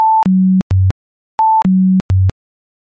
nemtom suspense
It's some kind of suspense music, sometimes used in TV shows. Thanks in advance!
I recreated it using a tone generator and basic editing, because no original source was found. It sounds something like this.
music, suspense, effect, unknown